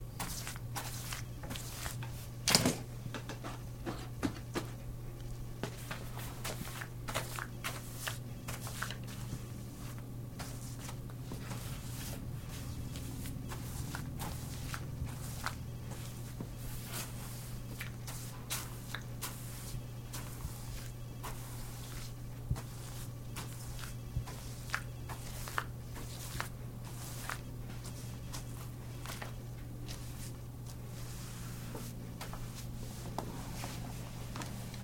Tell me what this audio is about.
plaster spread wallpaper glue
Plaster glue on wallpaper.
Recorded: 31.01.2013.
Format: Mono
Mic: ProAudio TM-60
Recorder: Tascam DR-40
plaster, spread, wallpaper, construction, repair, glue